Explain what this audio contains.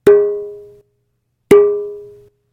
Coffie Can
It´s a metallic "coffee-can-drum" .usually I put my coffee beans in it..
recorded with an AKG Perception 220.